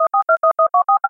I first generate a DTMF tonality with an amplitude of 0,8 of 15 second, then i changed the speed of the tone to reduce it. Finally i changed the hight without changing the tempo of 5,946 % , and ajust manualy some part of the tone to reduce the saturation of the tone.